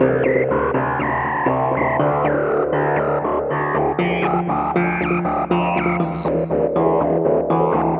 Riff @ 120 BPM 03 4bars
Created in Ableton Live using a built in preset from the Tension instrument, layered with an external VST instrument and fed through Guitar Amp 2.0 Free Edition (by Plektron), followed by KR-Delay CM Edition.
This is the second best loop I cut out from the jamming session.
Edited in Audacity so it loops seamlessly at 120 BPM. However, I do not recommend using it as a loop, but rather as a variation to the main loop.
synth, 120BPM